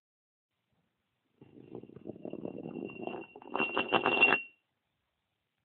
Rolling Metal
Small weight lifters disc rolling on concrete
iron, metal, metallic, Rolling